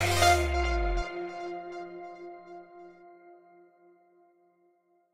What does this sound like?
A start-up sound, or, perhaps an angel landing... :)